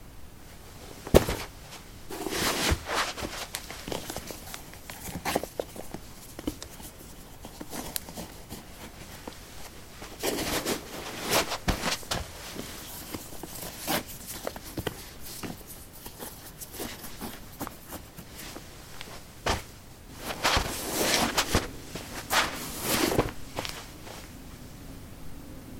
carpet 14d lightshoes onoff
Getting light shoes on/off. Recorded with a ZOOM H2 in a basement of a house, normalized with Audacity.
steps, footstep, footsteps